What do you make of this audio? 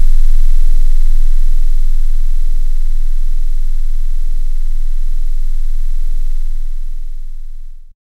04 E♭0 Sine, hand made
Some E♭0 19.445Hz sine drawed in audacity with mouse hand free with no correction of the irregularities, looping, an envelope drawed manually as well, like for the original graphical Pixel Art Obscur principles, except some slight eq filtering.